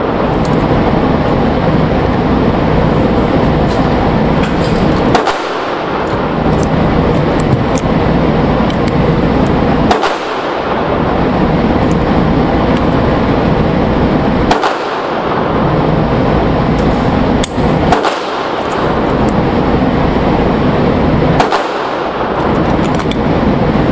GunRange Mega10
Shots from 9mm or 45 caliber from adjacent booth, reloading in booth
22, nine, facility, range, millimeter, 9, twenty-two, caliber, indoor, shots, gun